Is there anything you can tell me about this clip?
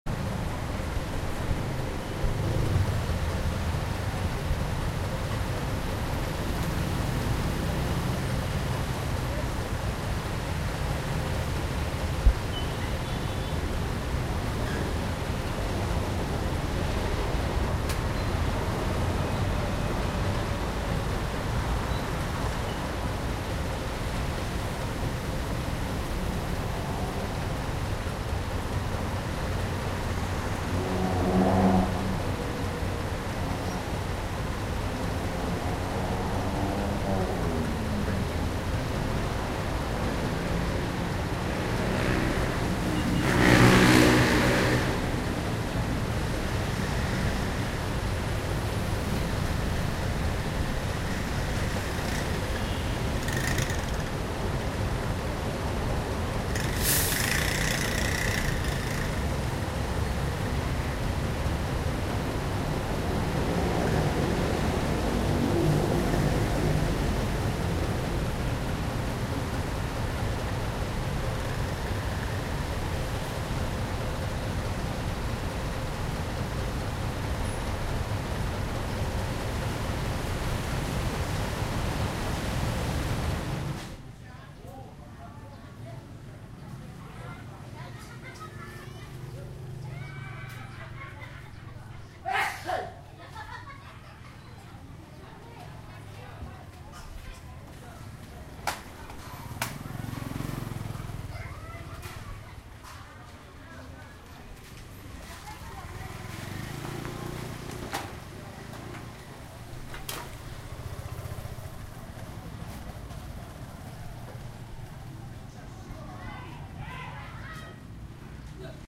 Pnomh Penh Authentic Street Sounds
Street Sounds of Pnomh Penh!
Recorded with Zoom H1
ambience, cambodia, car, cars, city, field-recording, general-noise, noise, penh, people, pnomh, sneezing, sounds, soundscape, street, town, traffic